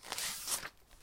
Page from a book being turned
turn, paper, page, book